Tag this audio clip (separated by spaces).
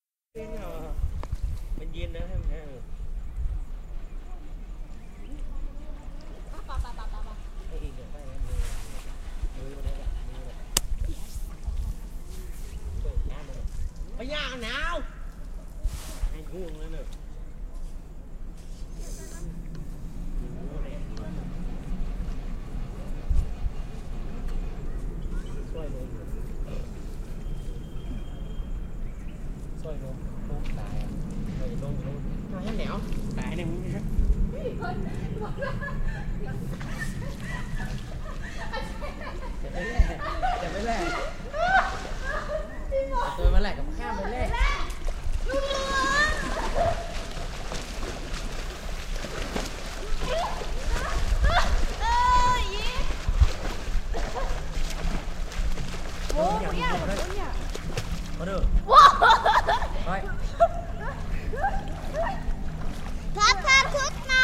machines street temples thailand